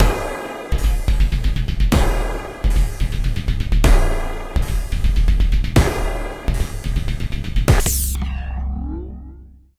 Cut off the last bit and you have a punchy kick drum loop....
atonal, beat, drum, percussive, quavers, semi, shot